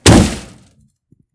Angrily slamming a door shut
I mixed in a few different doors from my house in order to get a thicker, richer sound. Enjoy!
Recorded for the visual novel, "The Pizza Delivery Boy Who Saved the World".
angrily, angry, close, closing, door, mad, shut, slam, slamming